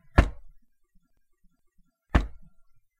Bedroom Large Dresser Drawer
A bedroom sound effect. Part of my '101 Sound FX Collection'